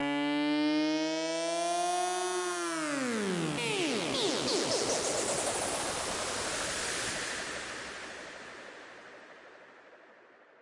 warp fx created in ni massive